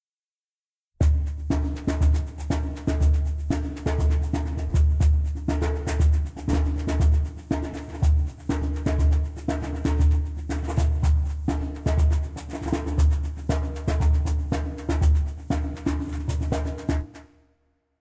LoFi Djembe Grooves I made, enjoy for whatever. Just send me a link to what project you use them for thanks.